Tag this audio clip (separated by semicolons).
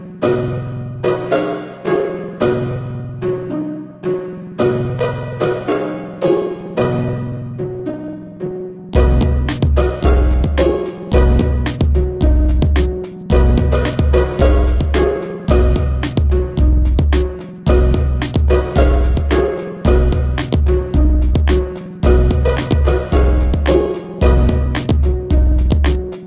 Happy; Music; GarageBand; Short